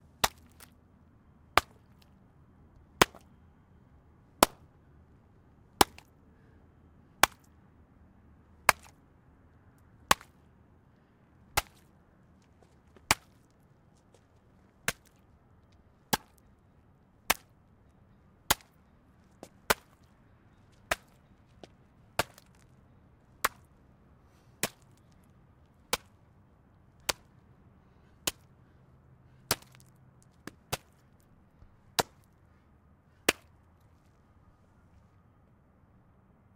pig head hammer more 2
bash, field-recording, hammer, head, pig